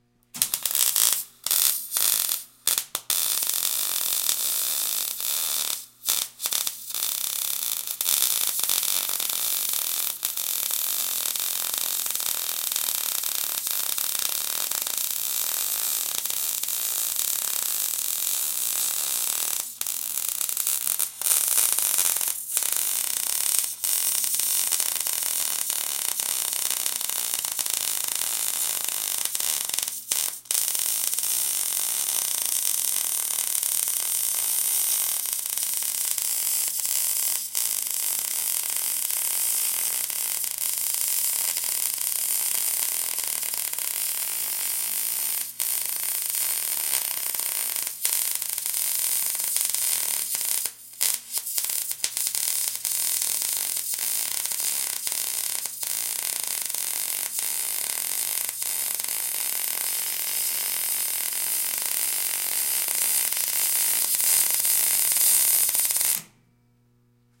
welder sparks good detail2 long straight weld
good, welder, sparks, detail